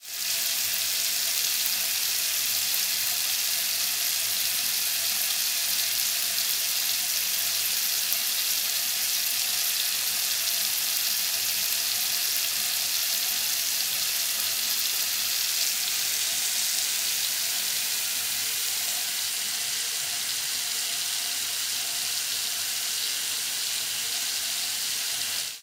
Water running in the washroom sink, recorded with an ME66.
faucet, liquid, sink, tap, water